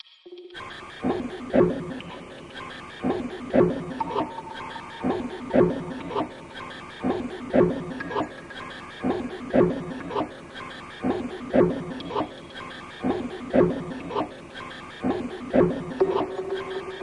CR - Darkflow
Good day.
Recorded with webcam - beatbox then sequence, filter, reverb.
Support project on
cinema, sci-fi, movie, sfx, atmosphere, ambience